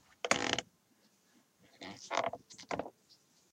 Desk chair squeaking.
Squeak, Squeaking, Chair